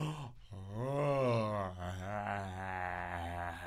sin aliento
breath, without, air